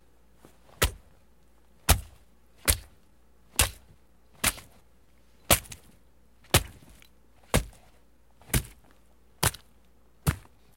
Smashing / squishing
Successive squishing, smashing noises.
blood, crack, smash, splash, squirt, squish